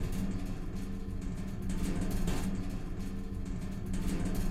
Using metal bars on window